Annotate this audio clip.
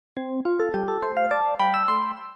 This is a looping ringtone that is the 1st of a set of electronic snippets inspired by my recent database course. This is created in GarageBand.
electronic, music, ringtone